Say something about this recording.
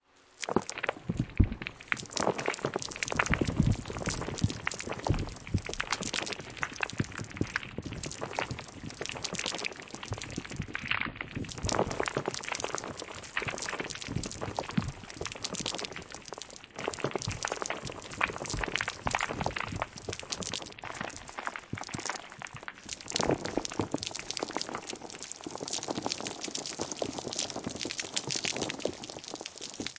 Sonido de lluvia con "truenos"